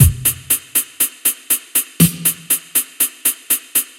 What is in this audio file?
8 Beat Drum Loop